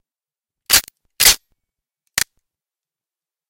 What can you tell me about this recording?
Cocking the hammer on a Spencer rifle. It was an Italian knock-off of an original. May require some trimming and buffing. I think I recorded this with an AKG Perception 200 using Cool Edit -- and I did it to get my room-mate to leave (after we recorded the sounds of several of his guns and a sword .
action, cocking, rifle, spencer, spenser